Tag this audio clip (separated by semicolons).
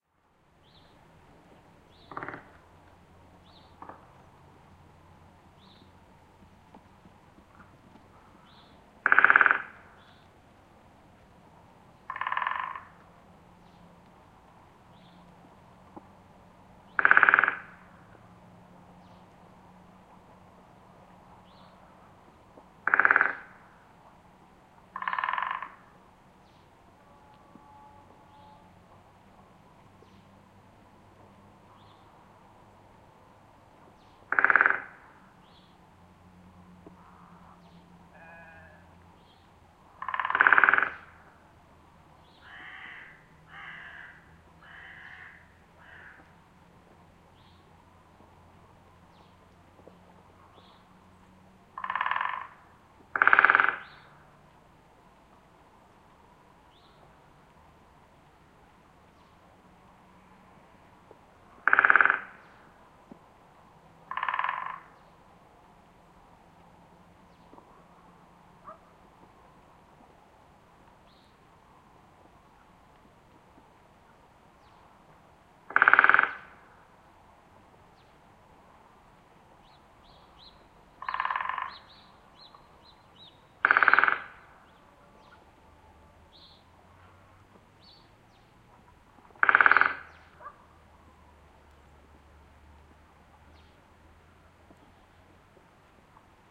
drum; drumming; woodpecker; xy